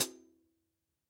hihat closed2
X-Act heavy metal drum kit. Zildjian Avedis Quick Beat 14". All were recorded in studio with a Sennheiser e835 microphone plugged into a Roland Juno-G synthesizer. Needs some 15kHz EQ increase because of the dynamic microphone's treble roll-off. I recommend using Native Instruments Battery to launch the samples. Each of the Battery's cells can accept stacked multi-samples, and the kit can be played through an electronic drum kit through MIDI. Excellent results.
rockstar, metal, hi-hat, kit, heavy, tama, hihat, zildjian, drum